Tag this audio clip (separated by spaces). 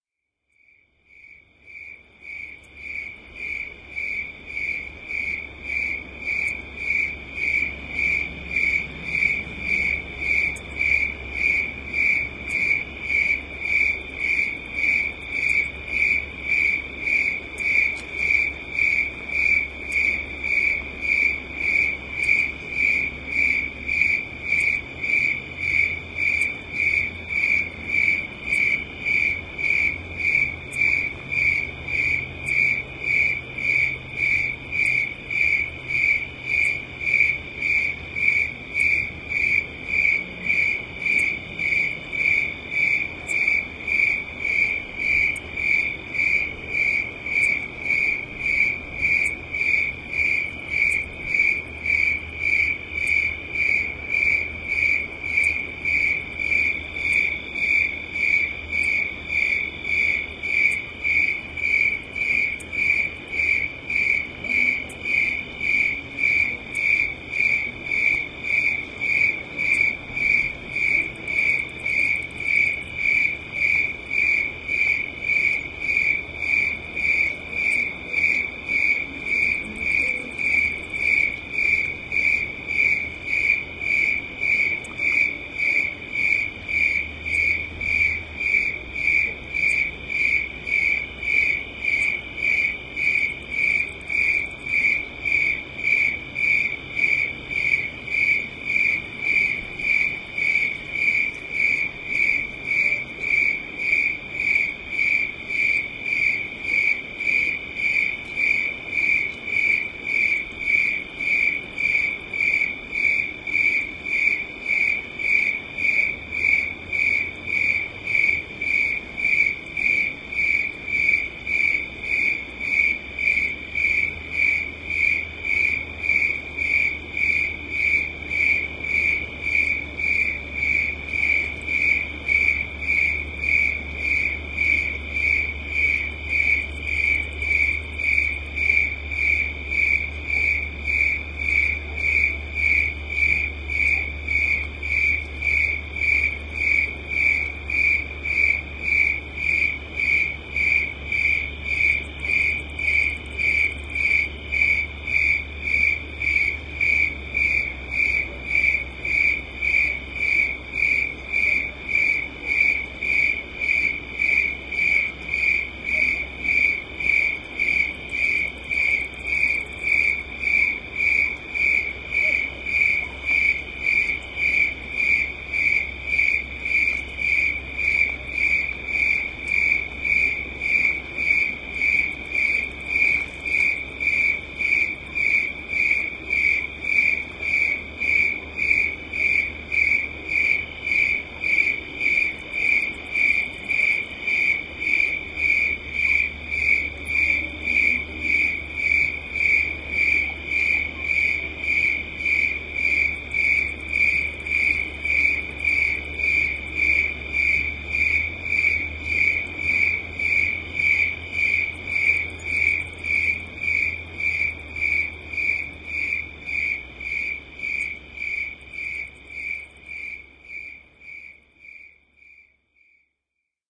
ambient california